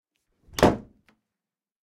Bathroom door slam shut harder
Slamming a bathroom door shut. It was recorded with an H4N recorder in my home.